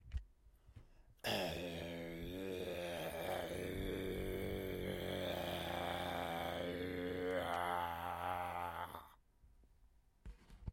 human zombie sound 1
Me growling like a zombie
growl, undead, zombie